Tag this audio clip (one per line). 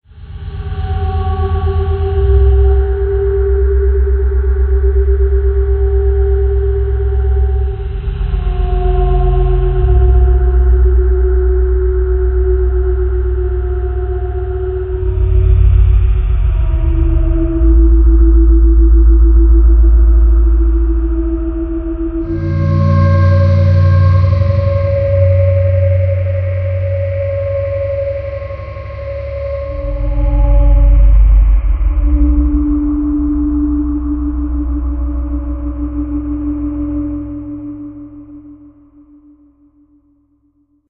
fnaf
music
spooky